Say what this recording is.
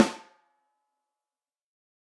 This sample pack contains 109 samples of a Ludwig Accent Combo 14x6 snare drum played by drummer Kent Breckner and recorded with eight different microphones and multiple velocity layers. For each microphone there are ten velocity layers but in addition there is a ‘combi’ set which is a mixture of my three favorite mics with ten velocity layers and a ‘special’ set featuring those three mics with some processing and nineteen velocity layers, the even-numbered ones being interpolated. The microphones used were a Shure SM57, a Beyer Dynamic M201, a Josephson e22s, a Josephson C42, a Neumann TLM103, an Electrovoice RE20, an Electrovoice ND868 and an Audio Technica Pro37R. Placement of mic varied according to sensitivity and polar pattern. Preamps used were NPNG and Millennia Media and all sources were recorded directly to Pro Tools through Frontier Design Group and Digidesign converters. Final editing and processing was carried out in Cool Edit Pro.